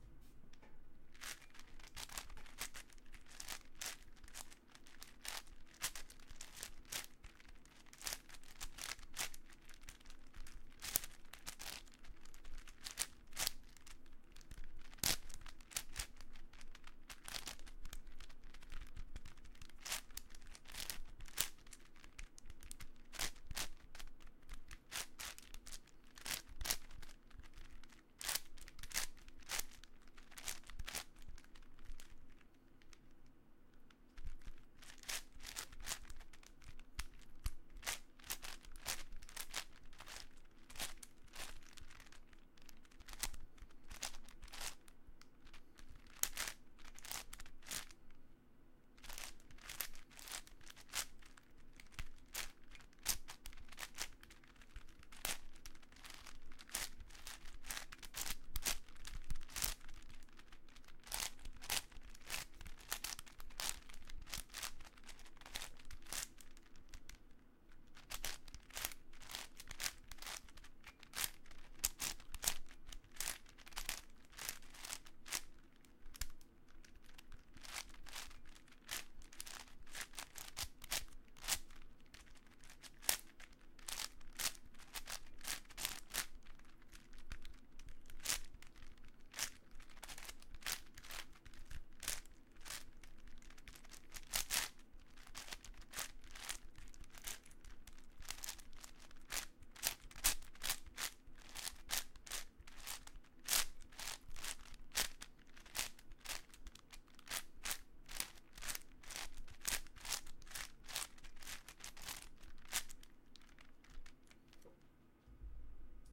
Me doing a quick solve of a 8x8x8 Cube
Click, Crunch, Cube, Puzzel, Rubiks, Rubikscube